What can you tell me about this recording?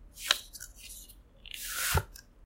unboxing a deck of cards - bycicle red